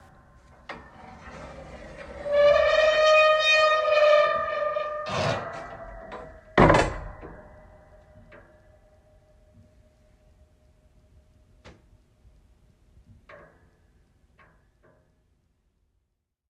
creaking attic door
creaky attic drop down ladder